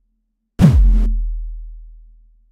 HK noiseOD1
I made this in max/mxp.
distorted, overdriven, noise, bass, kick, drum, percussion, oneshot